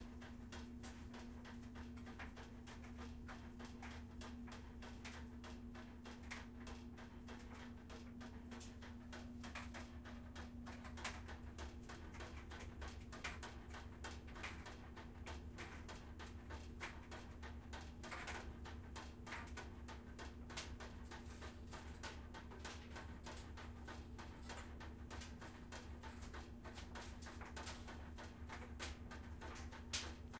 My dryer at home.